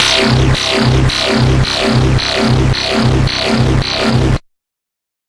110 BPM, C Notes, Middle C, with a 1/4 wobble, half as Sine, half as Sawtooth descending, with random sounds and filters. Compressed a bit to give ti the full sound. Useful for games or music.
1-shot, bass, digital, dubstep, electronic, Industrial, LFO, notes, porn-core, processed, synth, synthesizer, synthetic, techno, wah, wobble